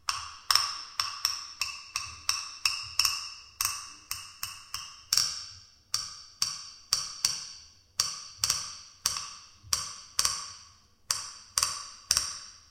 Pull up the clock.
Recorded with Zoom H1. Pulled up the old clock. Edited the recording in Sound Forge.